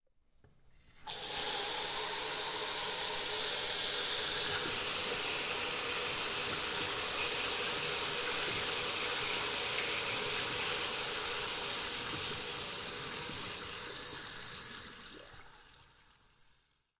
Sink Tap 2
splash, running, Sink